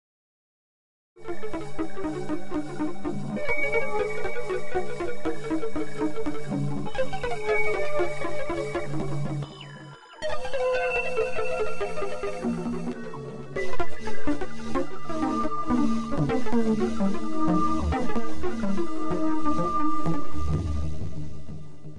Made in Reaktor 5.
ambient, atmospheric, electronic, idm, techno